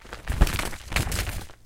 Shaking of a beef jerky bag, I think the packet that is supposed to keep it dry is thrown from side to side. Recorded very close to two condenser mics. These were recorded for an experiment that is supposed to make apparent the noise inherent in mics and preamps.
beef-jerky, crinkle, food-package, plastic